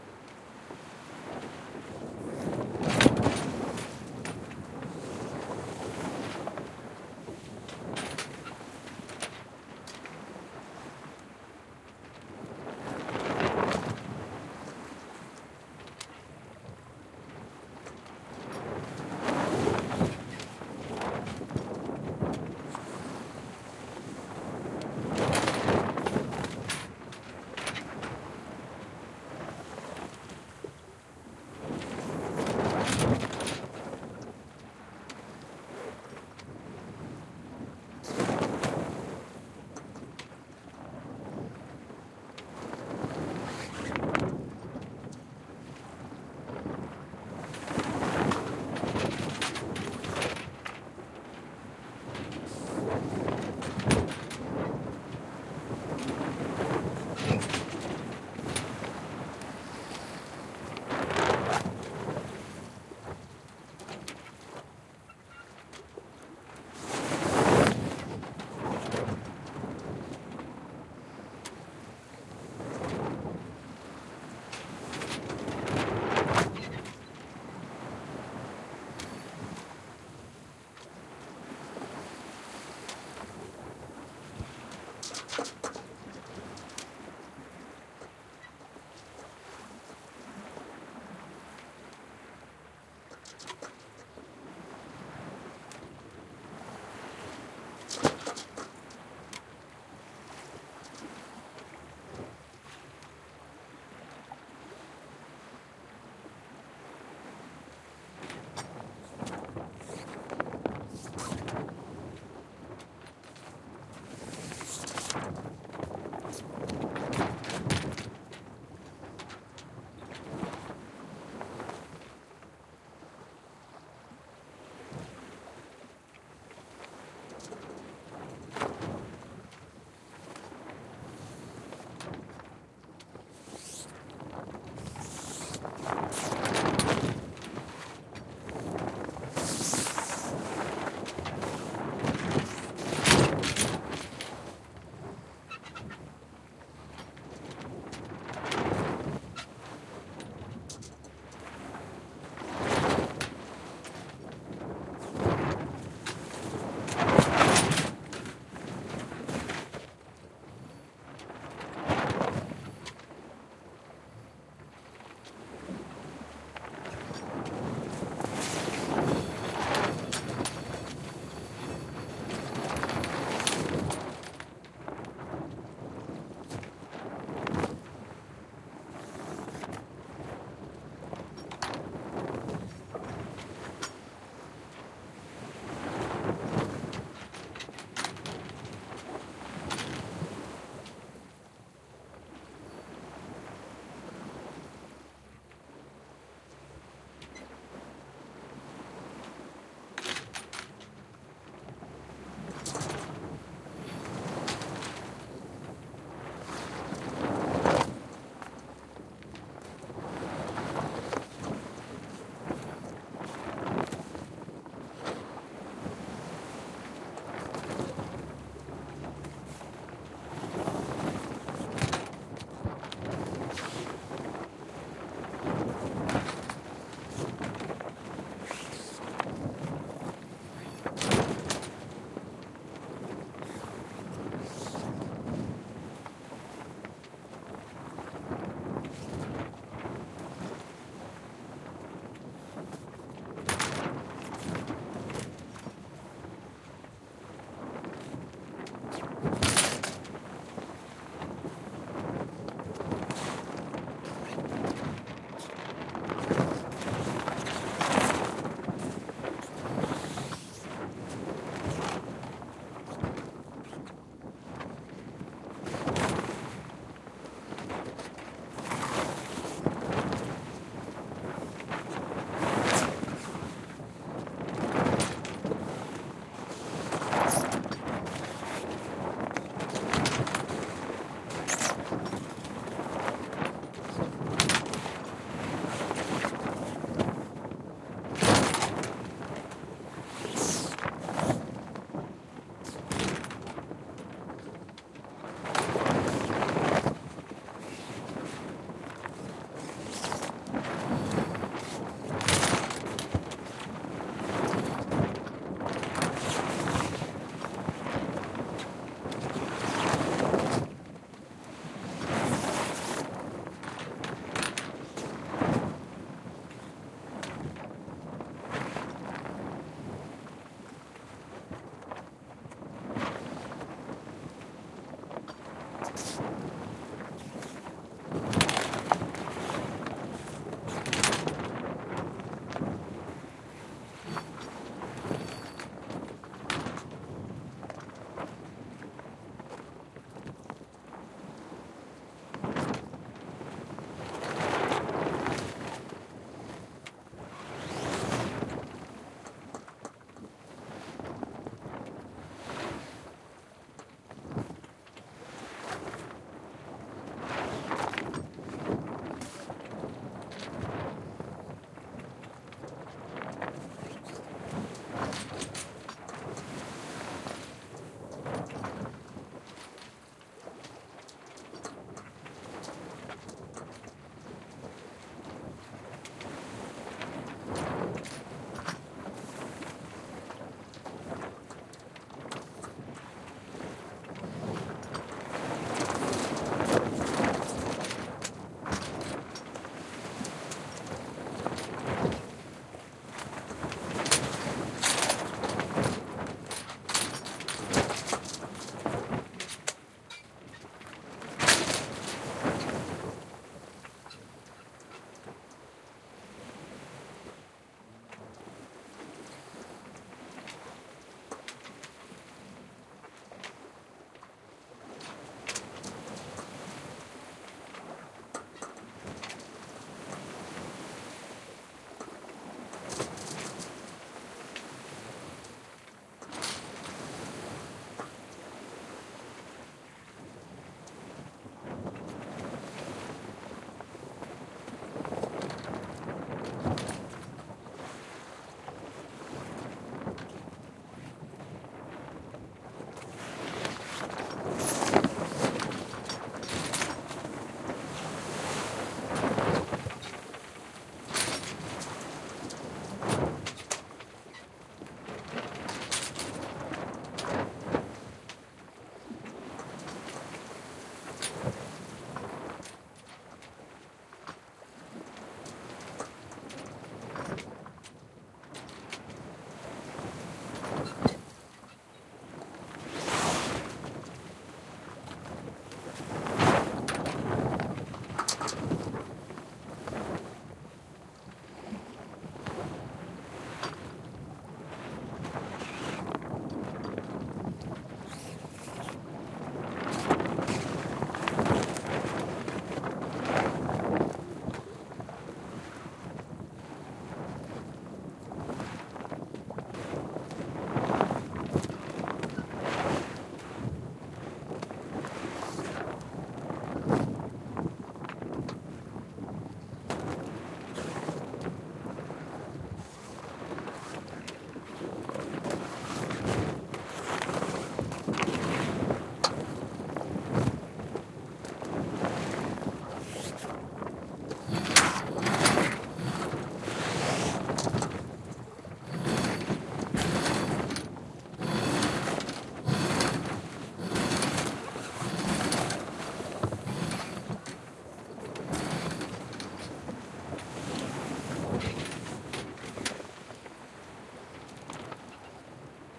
Sailing Ambience - Low Wind
Soundscape recording during a sailing trip. In this example, the wind was low so the sail was rarely full and instead flops lazily from side to side. This was the focus of this recording but of course a range of other sounds - waves, creaks, clanks - are heard also.
This was recorded using a Rode NT4 (in a Rycote WS4 blimp) into a Sony PCMD50 in 2007. I was lying on my back with my head against the mainmast and the microphone pointing up in the direction of the mainmast to try and capture the left-right movement of the sail's boom in the stereo image.
The boat's name is Rún na Mara which translates from Irish to 'Secret of the Sea'. The recording was made somewhere along the west coast of Scotland.
boat, rigging, sea